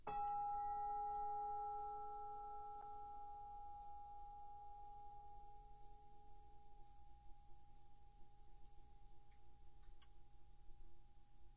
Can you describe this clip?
chimes e4 pp 1
Instrument: Orchestral Chimes/Tubular Bells, Chromatic- C3-F4
Note: E, Octave 2
Volume: Pianissimo (pp)
RR Var: 1
Mic Setup: 6 SM-57's: 4 in Decca Tree (side-stereo pair-side), 2 close
bells, chimes, decca-tree, music, orchestra